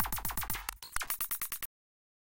flstudio random actions
experimental, procesed